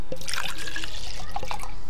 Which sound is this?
A cup of water pouring quickly.
pour, water